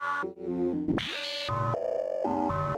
sound of my yamaha CS40M
sound, fx, sample